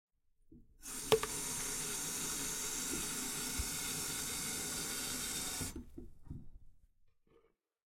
filling the water
cleaning, house, housework